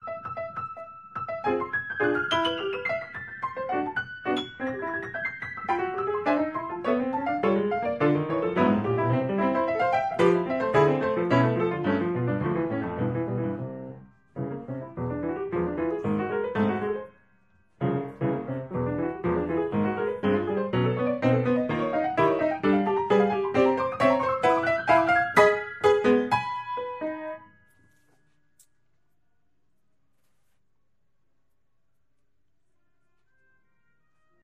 Practice Files from one day of Piano Practice (140502)
Logging, Piano, Practice